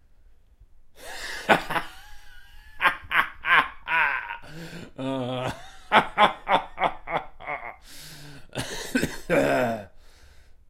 Recording of slightly raw laughter. Some wheezing ensues.